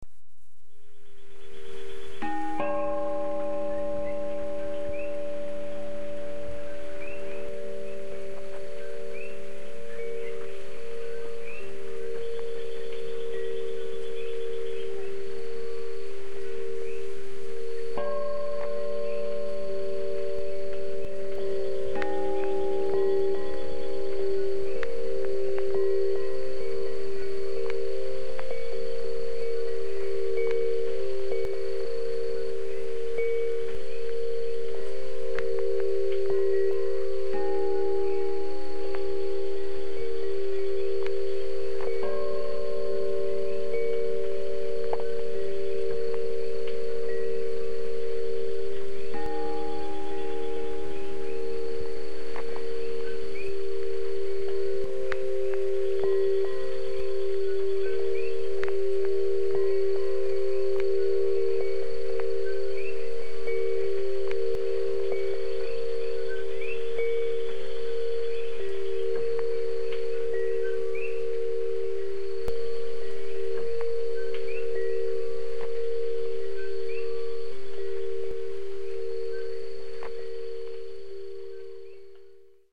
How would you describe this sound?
temple bells
wind chimes in the rain with birds or other nature sounds in the background in Hawaii
bells, birds, chimes, gong, Hawaii, meditation, ringing, wind